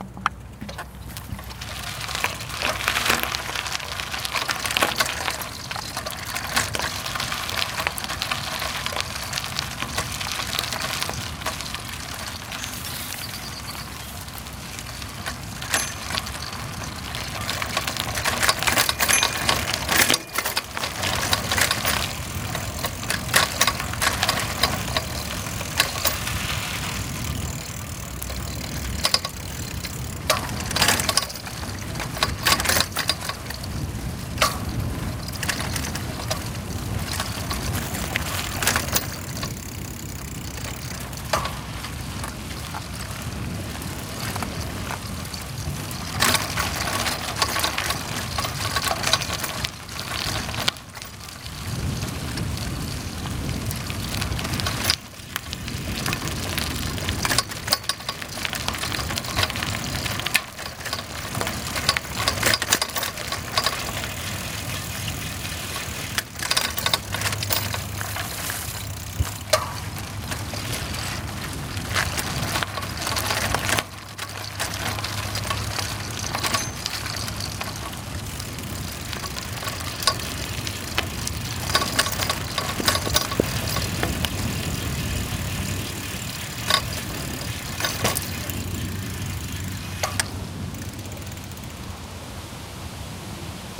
Bicycling Onboard Trail
Trail, Onboard, Bicycling